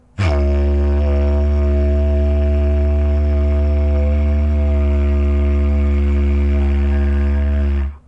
Made with a Didgeridoo